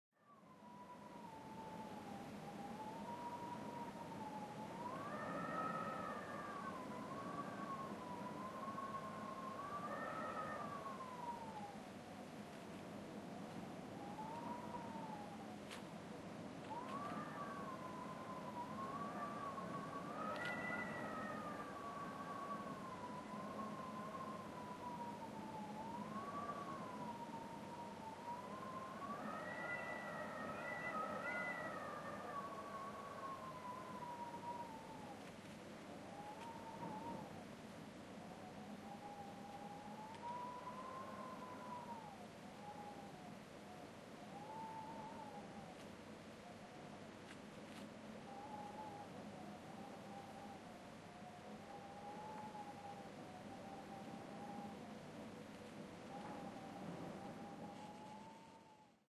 Gale Wind
This is a recording of the wind whistling between the cracks in the window, creating very unpleasant unpeaceful atmosphere (with occasional very quiet blind scratching against the window). Made with Canon camcorder.
gale, strong, draught, storm, whistling, wind